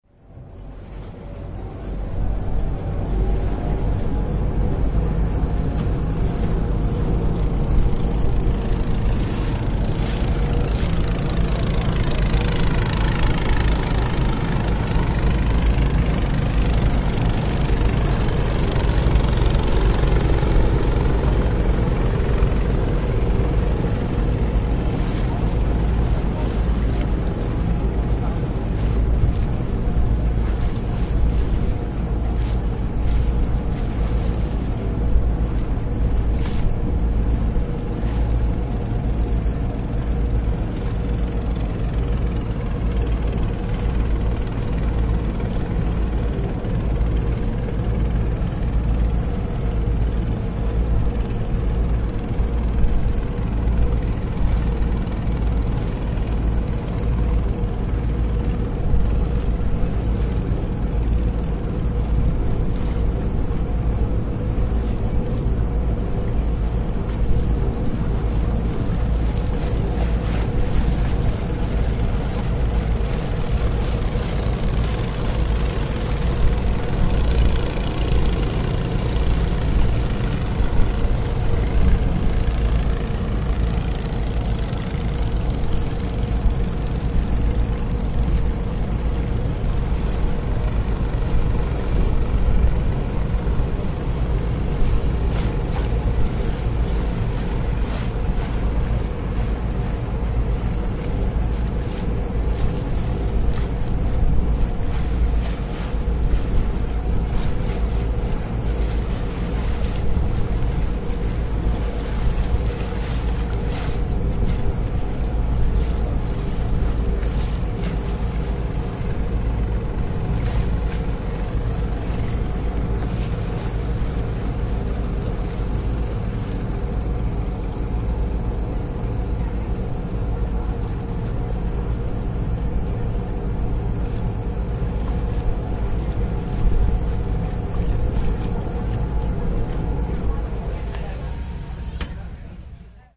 Ambiance on board of a ship during a trip on the Li-river in China
Boat, China